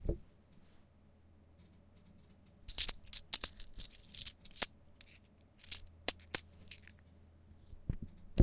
Handling Coins
Coins, cling, money